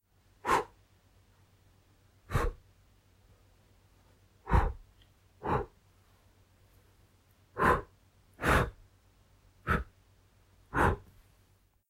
Various short blows/puffs of air from male mouth
Various short puffs or blows of air from male mouth. Recorded to simulate the sound of a man blowing dust off of a camera lens -- If you find this sound helpful, I'm happy to have a coffee bought for me ☕ (but you don't have too!)
♪♫ | RK - ☕ Buy me a coffee?
blow-air-from-mouth, puff, blow-dust, short, lens-dust, camera-dust-blow, male, mouth, human, air, man, blow, dust, puff-of-air, compressed-air